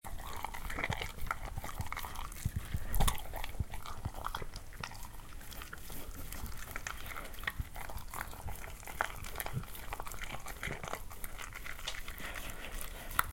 Theres a dog eating his meal